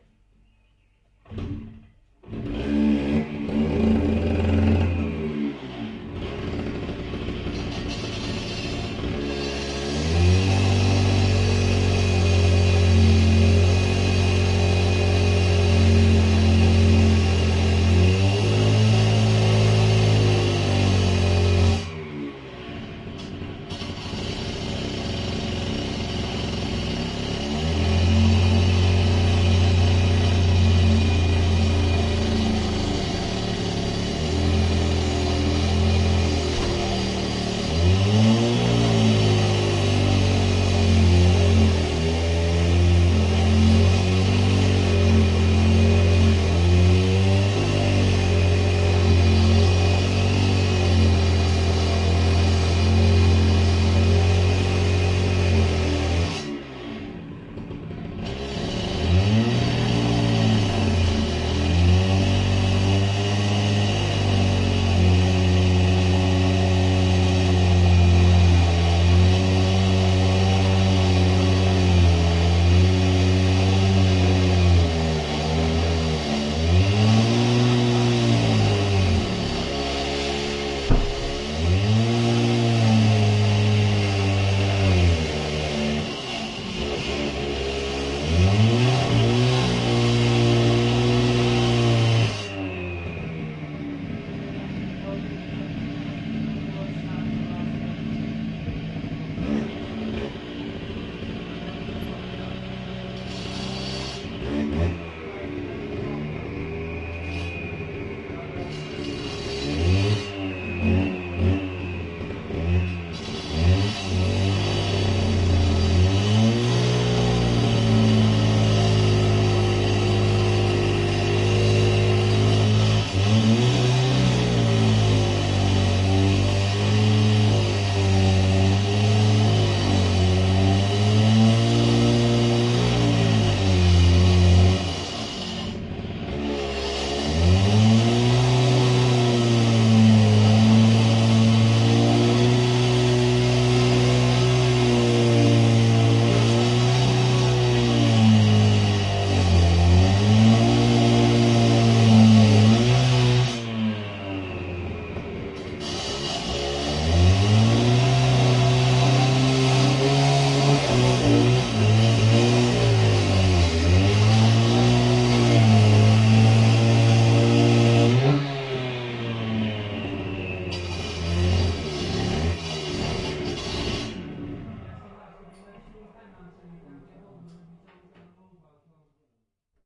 Petrol Masonry Cutter
A recording of a two-stroke petrol, diamond tipped circular masonry saw cutting concrete.Recorded from indoors but close to source, too much dust to record outside. Sony PCM-M10
gasoline, masonry-saw, petrol, quick-cut, saw, stihl, stihl-saw, stone-cutter, two-stroke